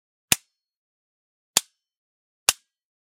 Field recording the dry fire of an empty M1 Garand. This sound was recorded at On Target in Kalamazoo, MI.